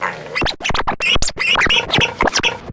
Snippet of scratch track from a song during the swine and piggy references.
dj, record